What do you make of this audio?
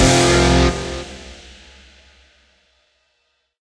guitar and drums (1/4) 90bpm Fsus